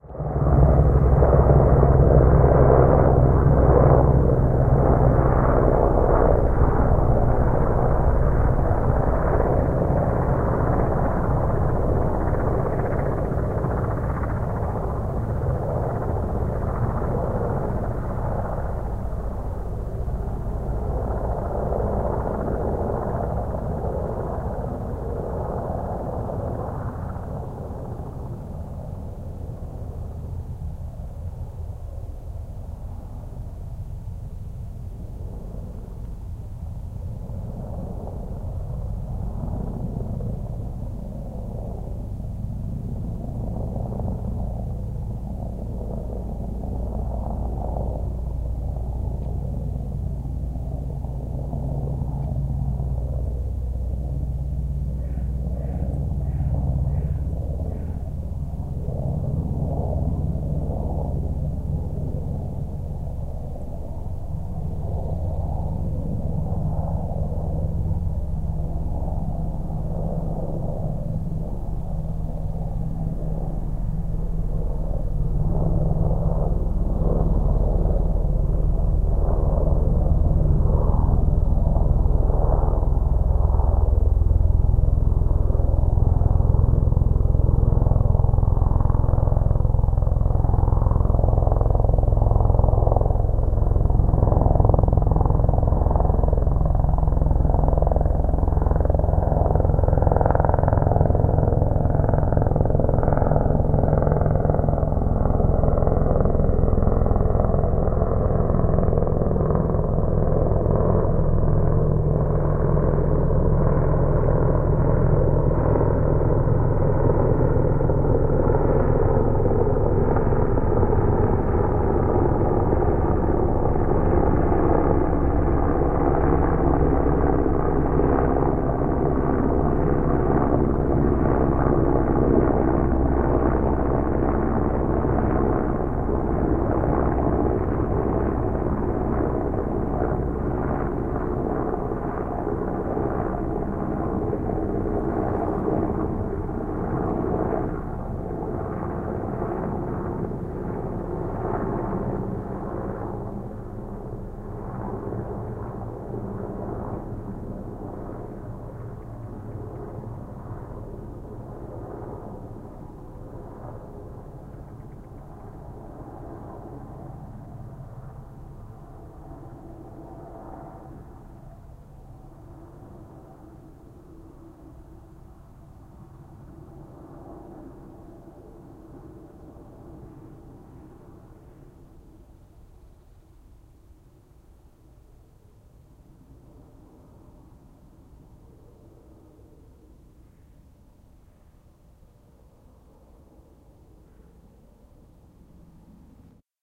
Helicopter L to R
A stereo field-recording of a large military helicopter flying to the left and away from the listener initially. It does a wide turn and comes back diagonally to the right. Rode NT-4 > FEL battery pre-amp > Zoom H2 line in.
xy, stereo, aircraft, air-force, royal-air-force, helicopter, field-recording, military